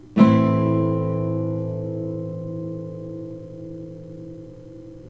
used TAB: 01230x(eBGDAE)
am, chord, minor